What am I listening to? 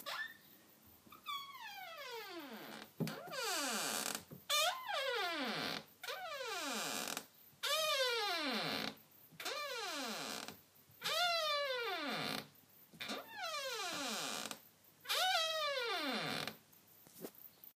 Creaky door opened and closed.
creak, door, field-recording